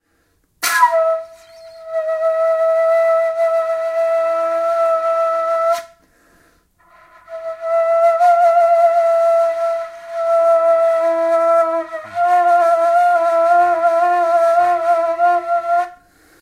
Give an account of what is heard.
Kaval Play 05
Recording of an improvised play with Macedonian Kaval
Acoustic, Instruments, Kaval, Macedonian